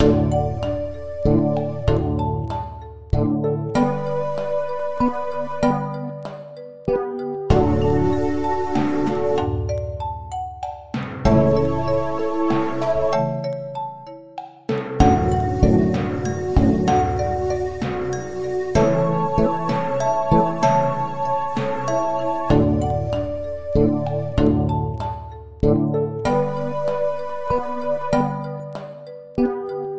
Gentle Waters
In this piece, listen to the vibraphones playing single calming notes one after the other continuously in the background. Do you notice the blown bottle? Enjoy the uplifting pulse of the occasional synth drum. If you listen keenly, you’ll hear the light tapping of the low conga and timbale, all combined to create this piece.
Computer-generated-music, Vibraphones, Calming, Artificial-intelligence-music, Algorithmic-music